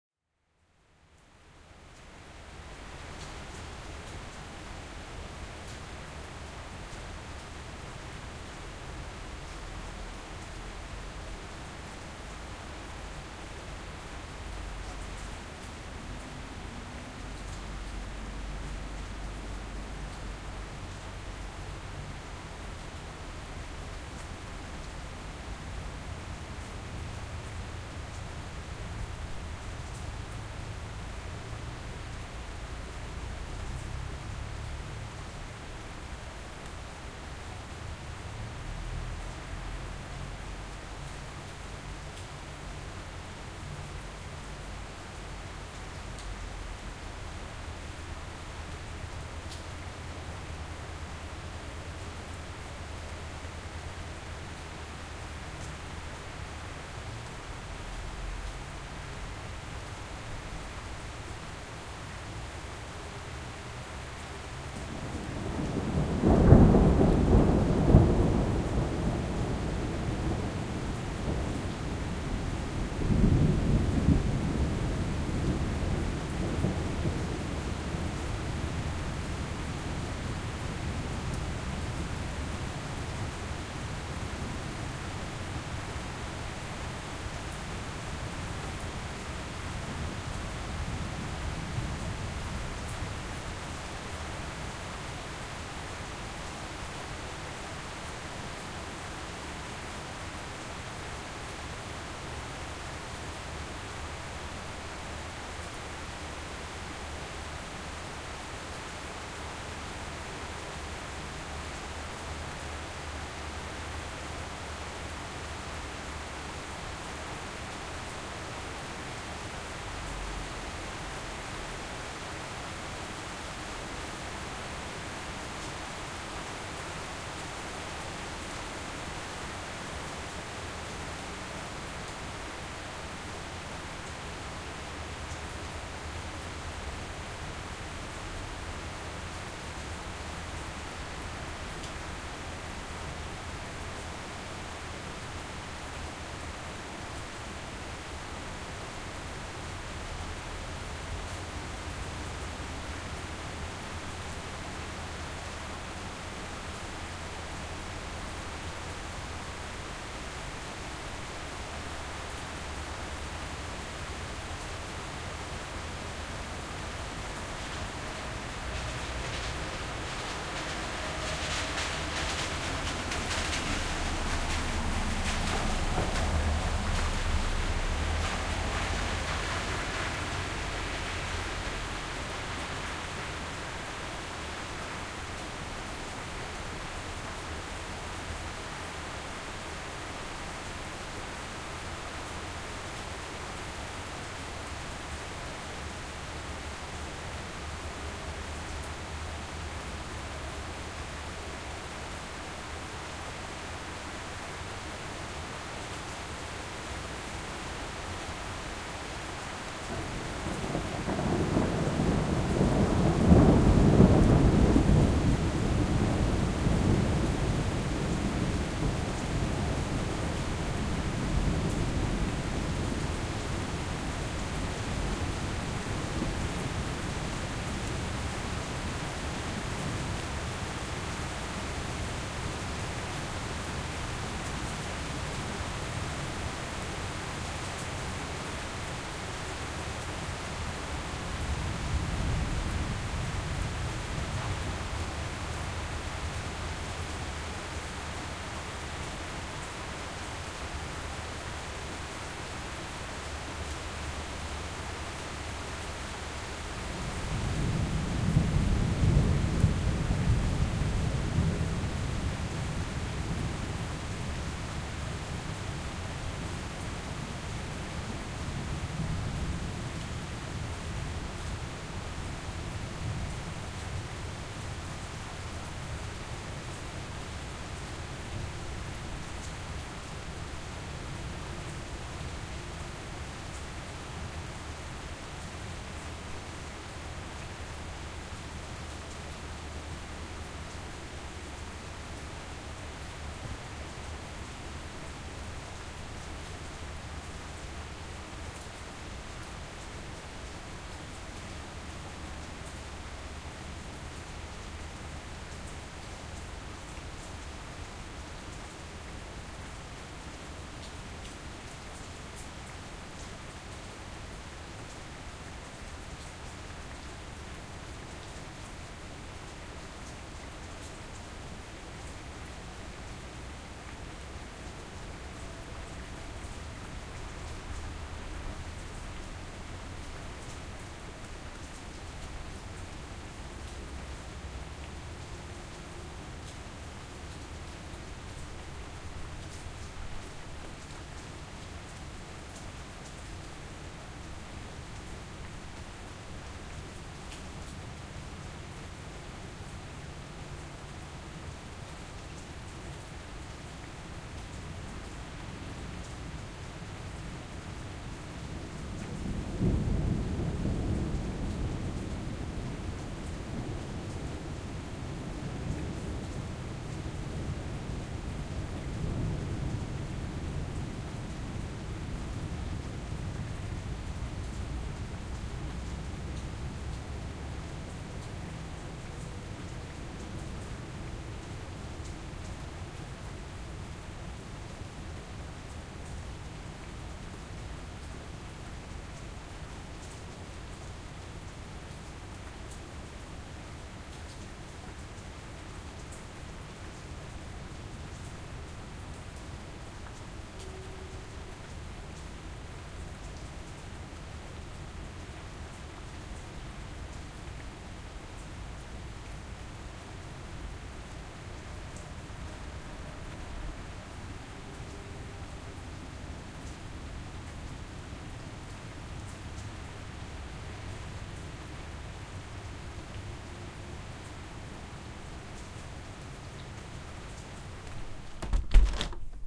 April Rain At Night
A nice little recording done from my apartment window in Bucharest during a lovely April night. A car passes, several thunders, varying in intensity and distance.